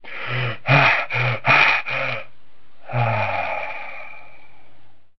Breaths - Lee relief 01
Breath recorded for multimedia project
breath, gasp